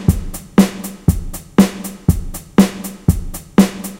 Just a drum loop :) (created with Flstudio mobile)
dubstep, loop, drums, beat, 120bpm, synth